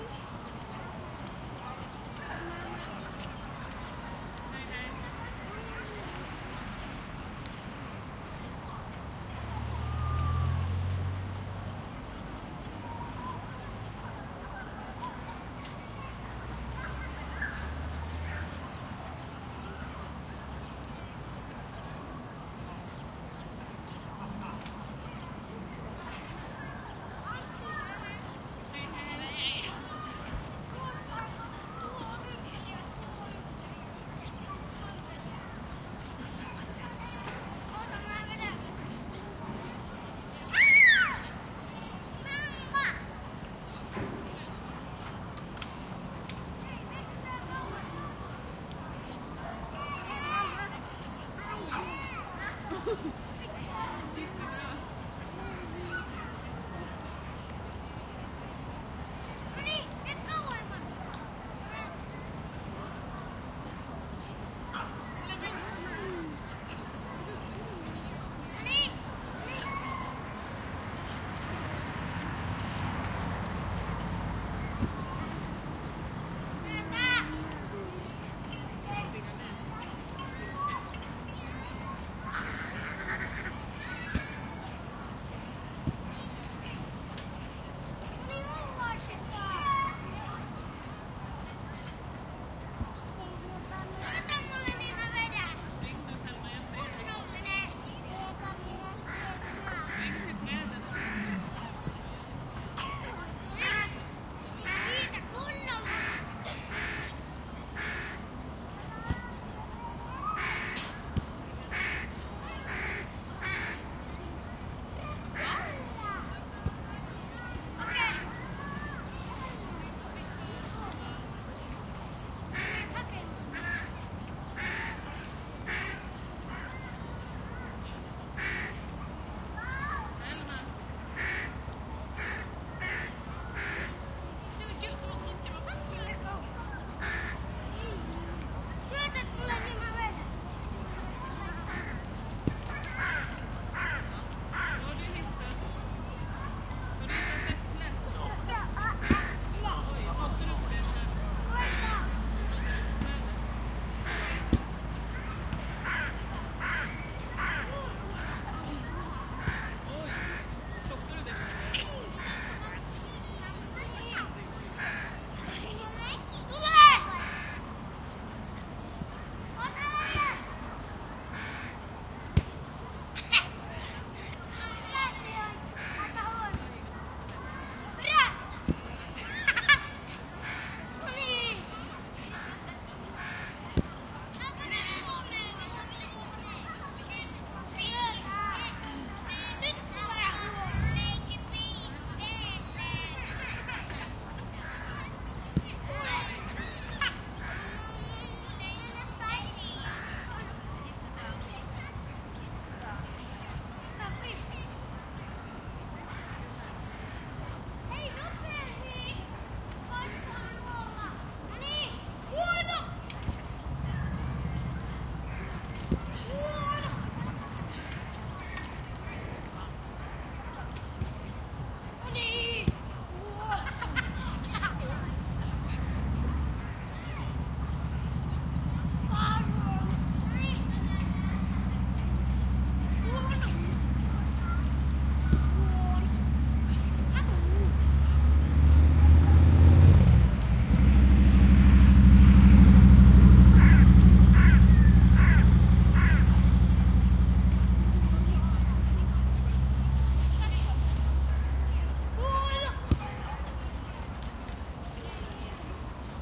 170719 Stockholm RosendahlsTraedgard F
The park area Rosendahls Trädgard in the east of Stockholm/Sweden. It is a sunny afternoon and people are lounging on the picnic meadow, some children are playing football in the midground. Voices, trees, some wind and birds, predominantly crows, feature. Near the end of the recording, a loud motorcycle passes by on the lane some 100m behind the recorder.
Recorded with a Zoom H2N. These are the FRONT channels of a 4ch surround recording. Mics set to 90° dispersion.
city, birds, Sweden, motorcycle, ambience, Europe, surround, field-recording